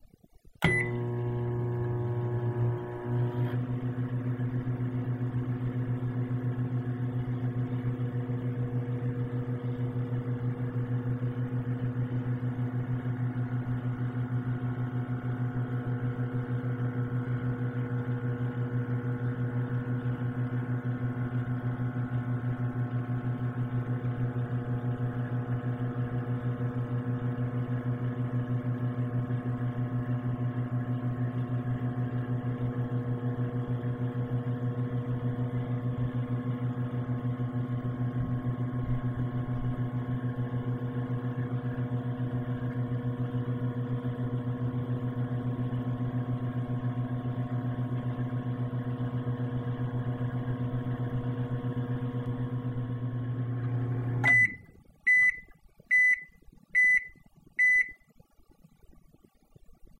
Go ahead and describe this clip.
Running microwave oven
Running a microwave oven.
drone, microwave, run